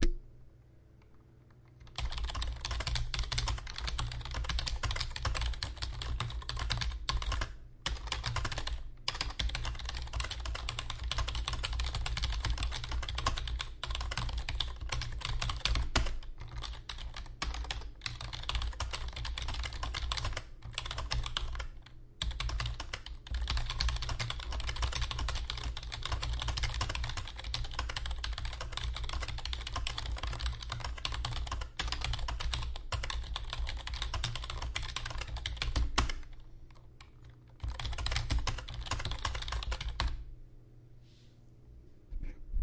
Typing on keyboard 5 (fast)
ambient, computer, keyboard, office, office-sounds, soundfx, Typing